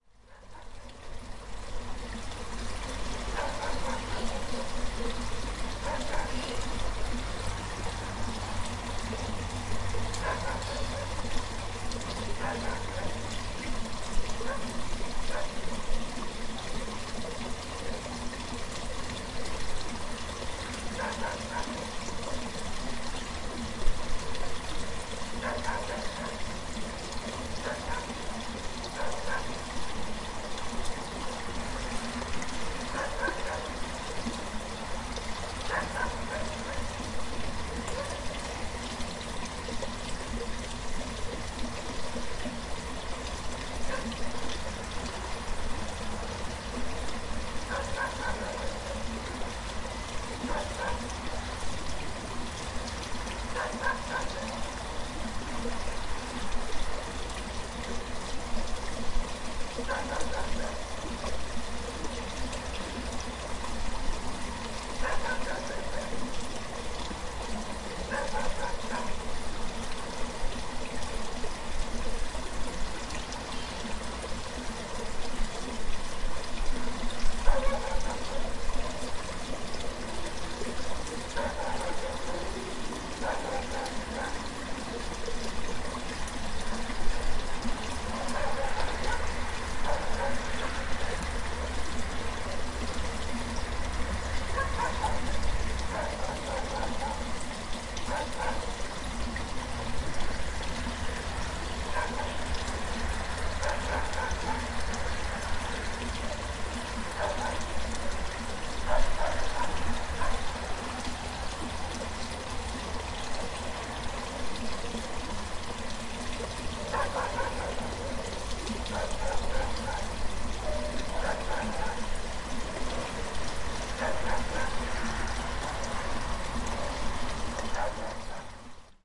skomielanka river 04.11.18
04.11.2018: the ambience of Skomielanka River between Skomielna Biała and Rabka Zdrój in Poland.
field-recording, Poznan, street, river, ambience, barking, water, dog, Podhale